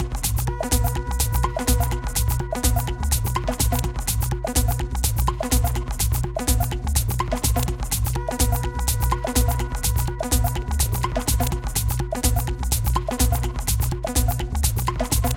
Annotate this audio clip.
Minimal Lift A (125bpm)
build, tech-house, techno